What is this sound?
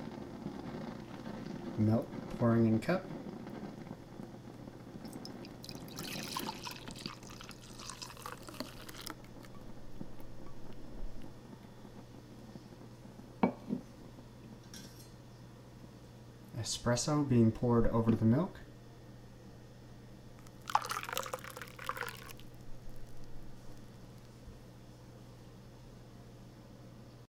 Pouring Milk & Espresso
This is a recording of pouring the steamed milk and espresso in a cup.
Sorry in advance for my voice, narrated each step of the process.
This recording has not been altered.
Signal Flow: Synco D2 > Zoom H6 (Zoom H6 providing Phantom Power)
espresso, pouring, cup, liquid